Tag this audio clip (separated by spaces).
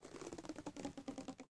elastico estirar halar